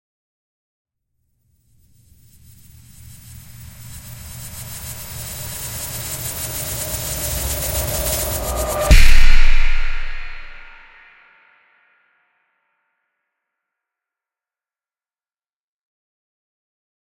SFX Thrilling Futuristic Build-Up and Hit 1 (Made at Paradise AIR)
I recorded a lot of sounds in the area, and edited them into a series of thrilling sound effects.